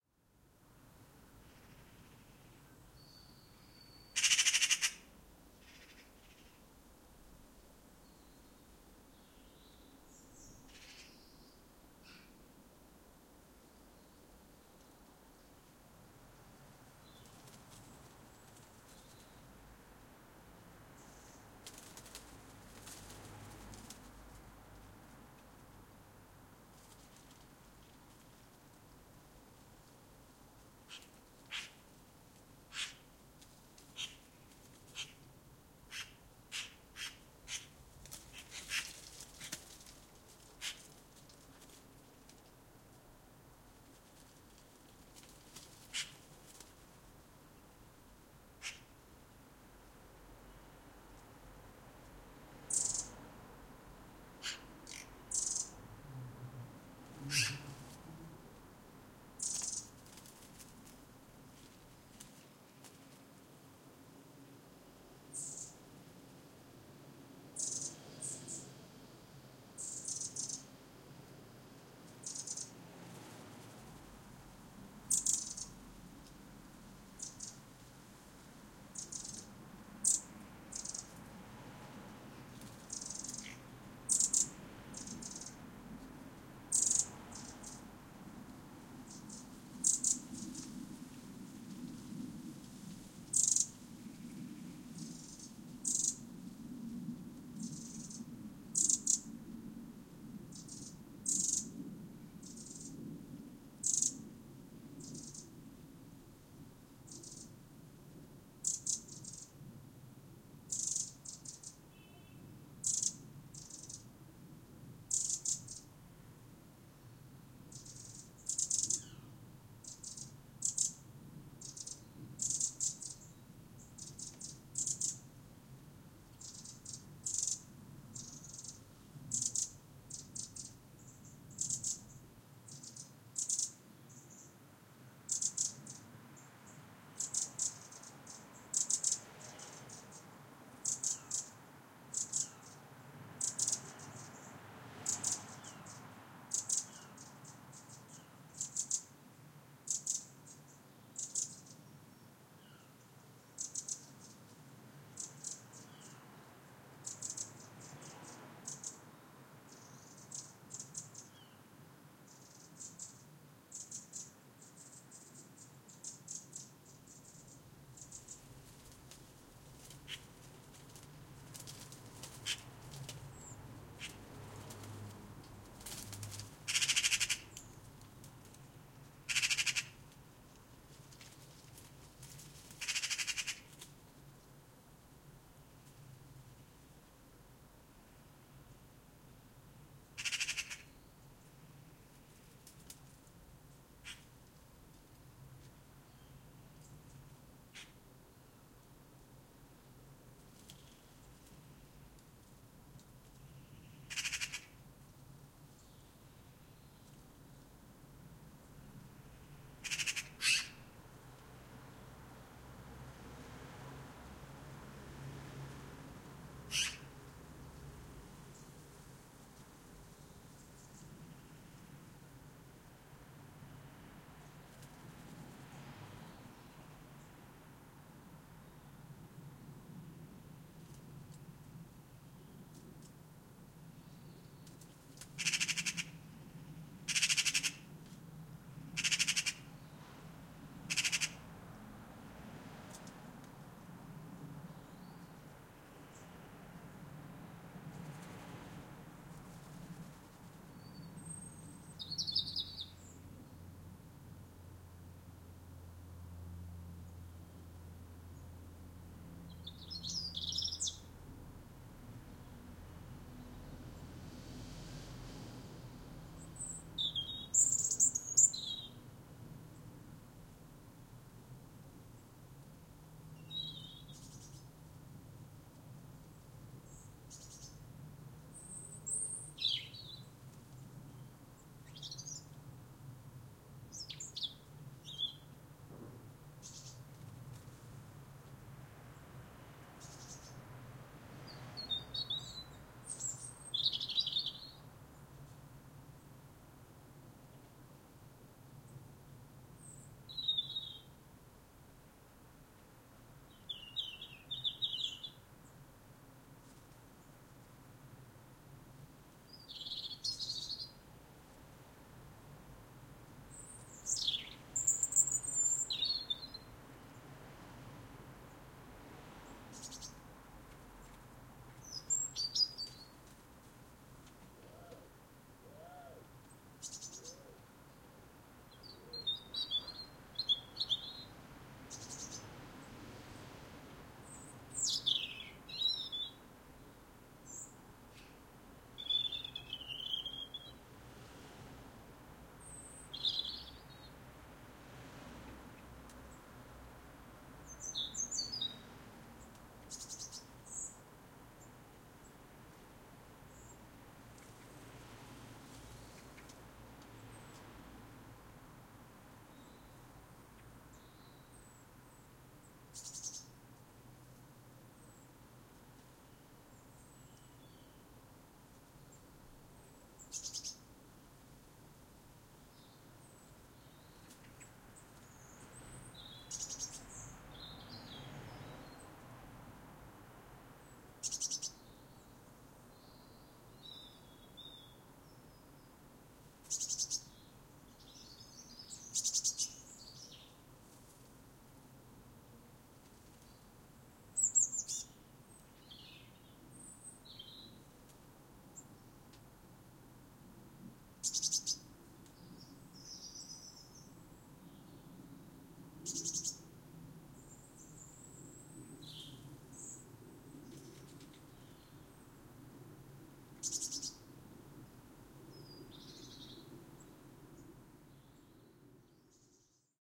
My garden again. 30th November 2019.
Zoom H5 with four custom mics, downmixed to stereo.
winter, uk, birds, southampton, garden